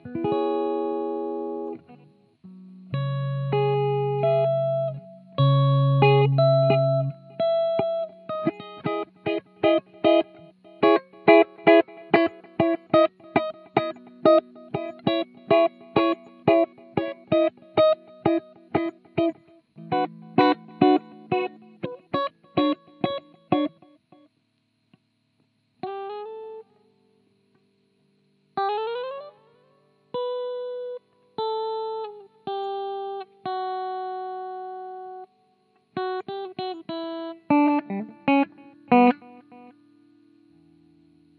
Original live home recording
rhythm, chords, improvisation, guitar